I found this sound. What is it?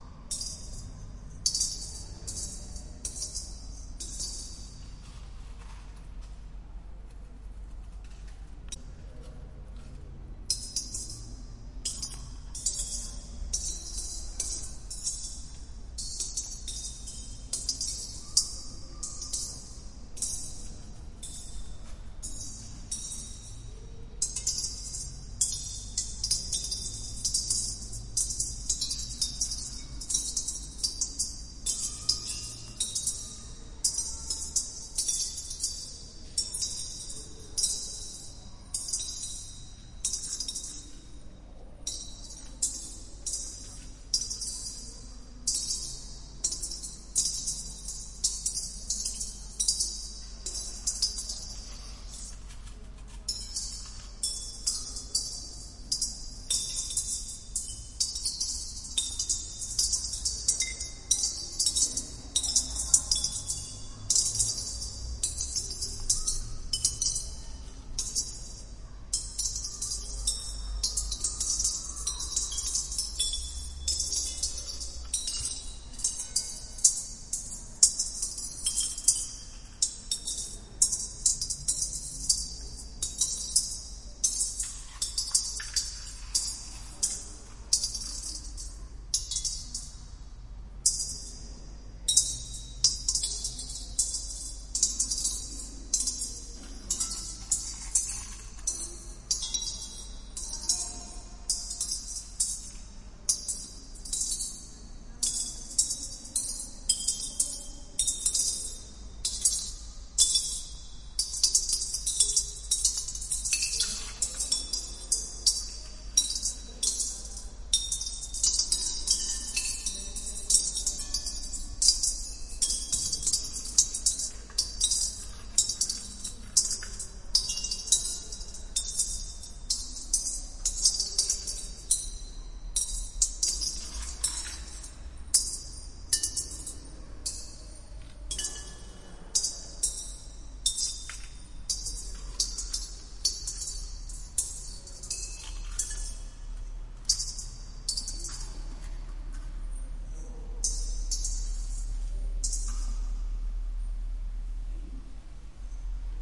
Round sound make with coins in a temple in Bangkok
Coins, temple